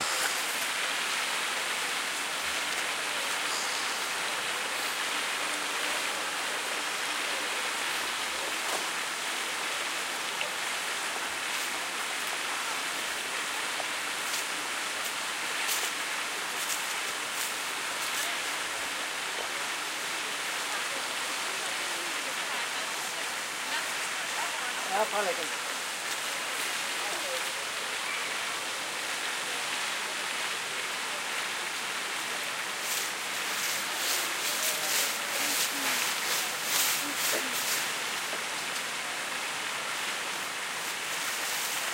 Water in the park
Park fountain recorded on Zoom H4